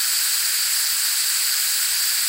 air hiss pressure loop
hiss air blast